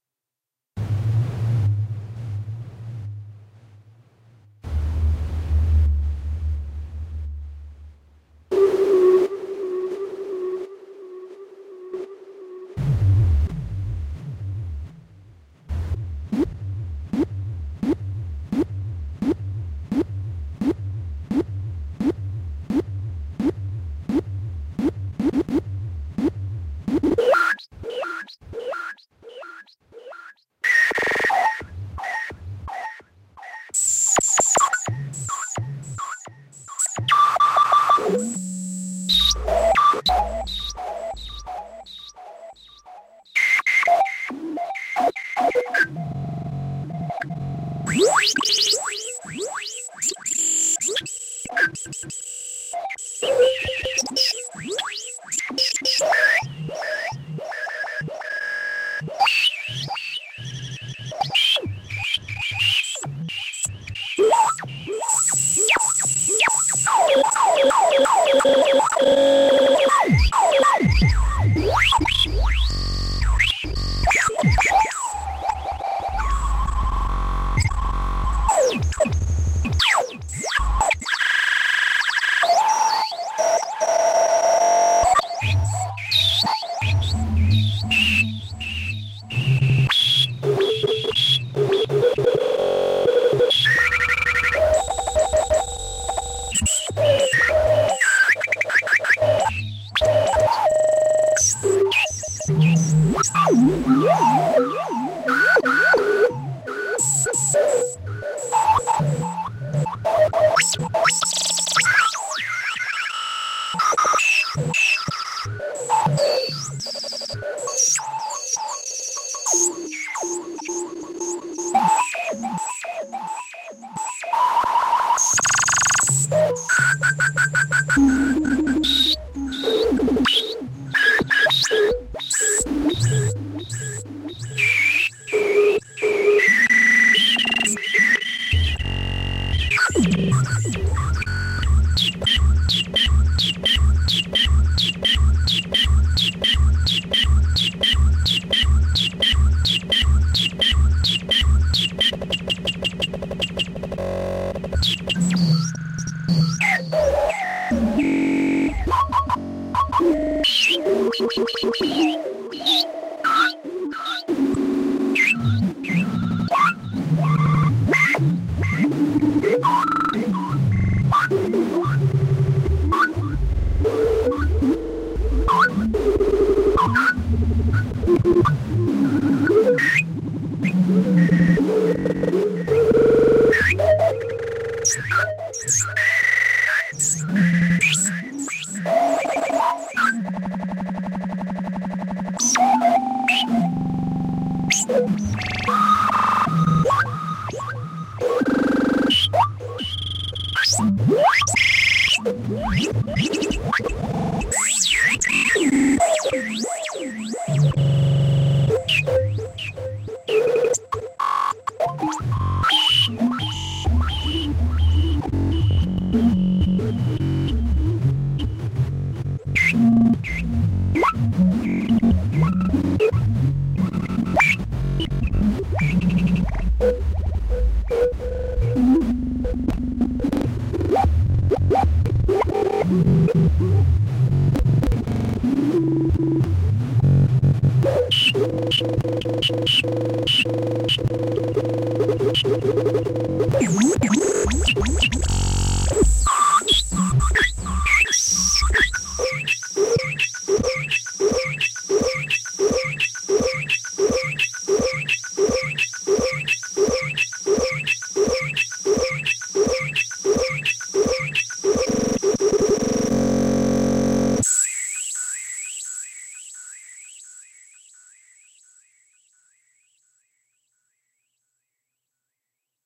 glitchy pad
This is part of a series of experimental synthesized tracks I created using a Korg Kaoss Pad. Performed and recorded in a single, real-time situation and presented here with no added post-production.
The KAOSS PAD lets you control the effect entirely from the touch-pad in realtime. Different effect parameters are assigned to the X-axis and Y-axis of the touch-pad and can be controlled simultaneously, meaning that you can vary the delay time and the feedback at the same time, or simultaneously change the cutoff and resonance of a filter. This means that complex effect operations that otherwise would require two hands on a conventional knob-based controller can be performed easily and intuitively with just one hand. It’s also easy to apply complex effects by rubbing or tapping the pad with your fingertip as though you were playing a musical instrument.
bleeps, electronica, electronics, kaoss-pad, science-fiction, sci-fi, sound-effects, space, synth, synthesizer